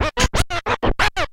scratch295 looped
Rhythmic melodic scratched phrase. Technics SL1210 MkII. Recorded with M-Audio MicroTrack2496.
you can support me by sending me some money: